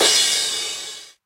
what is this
Sampled by Janne G:son Berg from his old 909. Cut up and organized by me.
Sampled in one session from my (now sold) 909.
/Janne G:son Berg 2005